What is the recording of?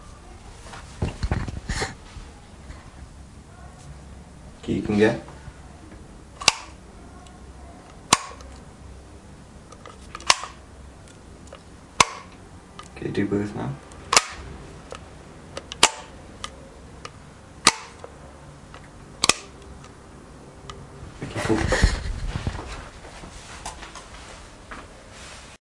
Light Switch OWI
Recorded with rifle mic. Light switch turning off and on.